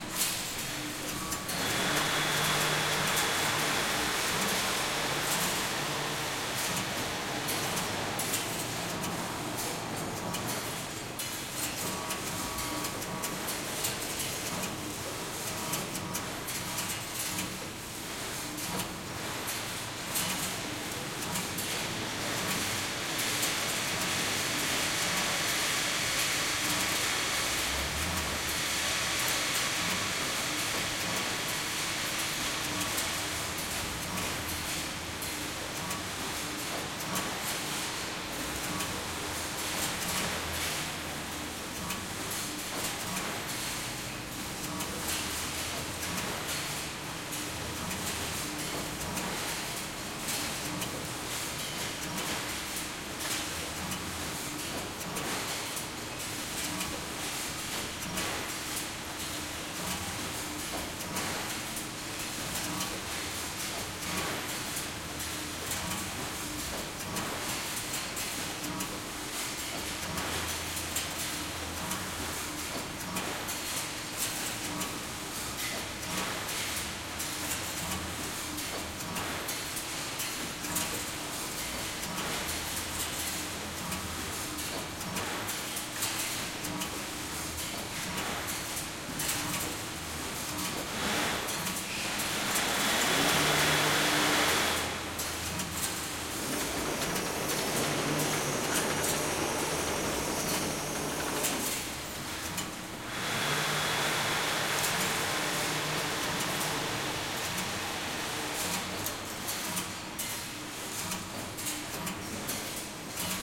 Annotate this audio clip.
02 - armature rolling stock
Sound of rolling armature stock, Recorded on reinforced concrete plant. Recorded on Zoom H6